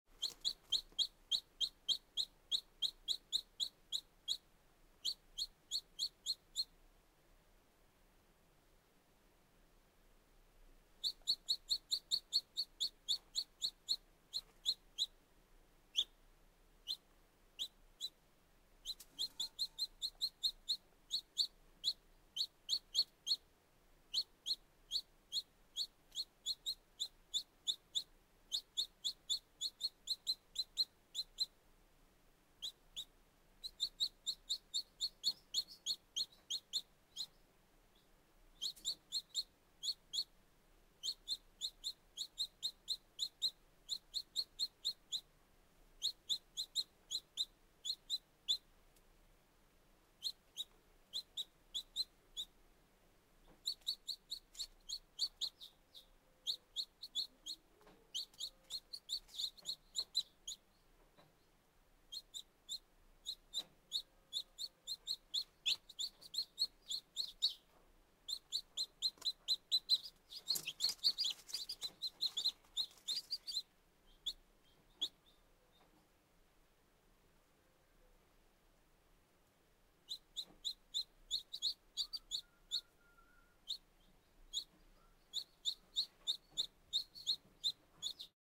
Chicks very young
2 day old chicks chirping, about four chicks active peeps